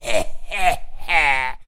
Human Evil 00
A clean human voice sound effect useful for all kind of characters in all kind of games.
arcade, fantasy, game, gamedev, gamedeveloping, games, gaming, Human, indiedev, indiegamedev, RPG, sfx, Speak, Talk, videogame, videogames, vocal, voice, Voices